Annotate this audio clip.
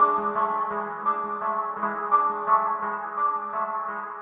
short repeating picked guitar notes plus some tape delay
guitar, loop